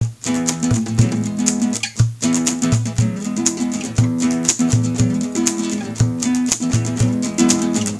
SOUL SHAKE Guitar+Percussion
A collection of samples/loops intended for personal and commercial music production. For use
All compositions where written and performed by
Chris S. Bacon on Home Sick Recordings. Take things, shake things, make things.
acapella, acoustic-guitar, bass, beat, drum-beat, drums, Folk, free, guitar, harmony, indie, Indie-folk, loop, looping, loops, melody, original-music, percussion, piano, rock, samples, sounds, synth, vocal-loops, voice, whistle